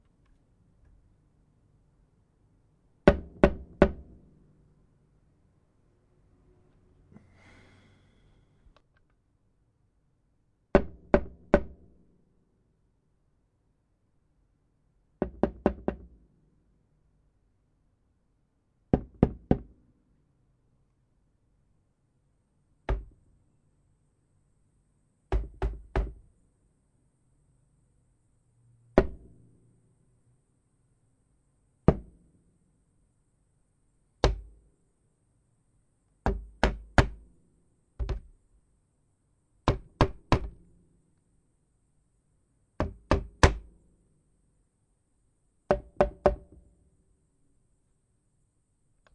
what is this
Bangs, Knocks, Thuds and Hits
Various door knocks, made by tapping a hammer on different pieces of wood.
created by A. Fitzwater 2017
tool, bang, front, door, build, closed, wooden, knocking, open, hammer, tap, thud, banging, knock, wood